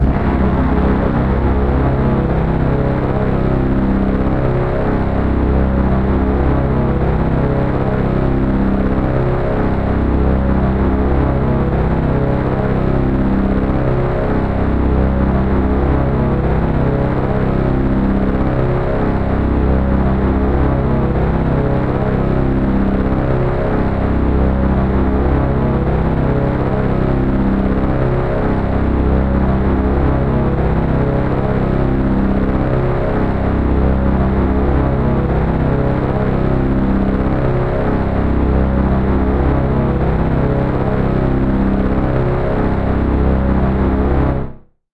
Krucifix Productions DARK SUN SUSTAINED 2018
film, dark, movies, atmosphere, scoring, ambience, cinematic, music, theatrical, processed